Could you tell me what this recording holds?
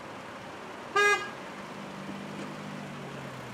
FX - claxon 1
car, claxon